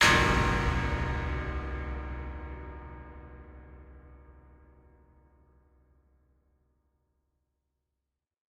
The ringing of hell's bells. Please write in the comments where you used this sound. Thanks!